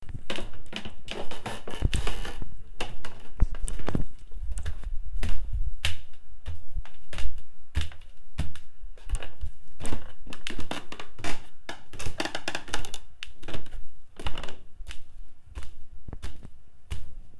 down squeaky stairs
walking down the stairs in my 100 year old home. Sounds of footsteps and creaking - at the end a quiet door knocking
stairs, old, suspense, house, creepy, buildings, spooky, haunted